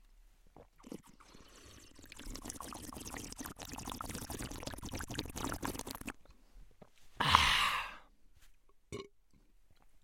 male drinking slurping aaaaaaaaaaah small belch
Male slurping some water followed by a small burp. Recorded in the anechoic chamber at the USMT
anechoic-chamber, male, slurp, voice